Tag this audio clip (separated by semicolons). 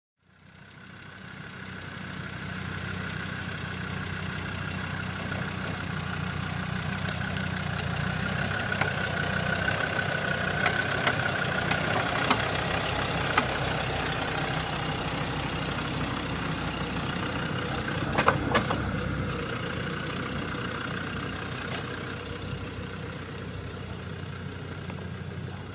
bumpy; car; Off; road